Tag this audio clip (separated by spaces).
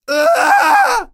scream yell